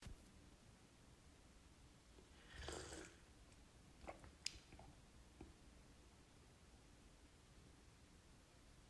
A coffee slurp. Recorded with iPhone 8.